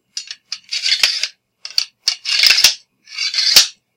Sword Sheath
Sword Re-Sheathing sounds x3.
Made with a dagger i have got at home & its sheath.
Not high quality, but enough for my application.
May be useful to someone.
Combat, Dagger, Game, Medieval, Ready, Sheath, Unsheath, War, Weapon